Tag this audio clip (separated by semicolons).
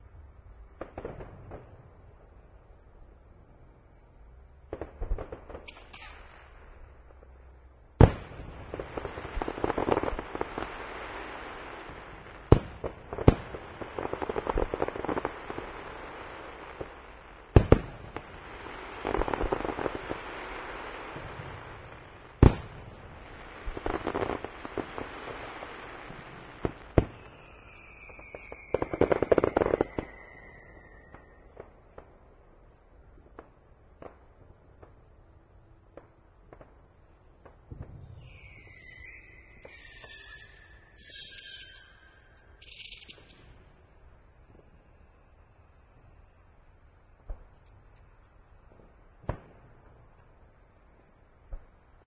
fireworks; crowd; field-recording; ambiance